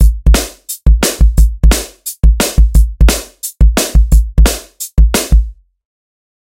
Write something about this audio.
This is part of a dnb drums mini pack all drums have been processed and will suite different syles of this genre.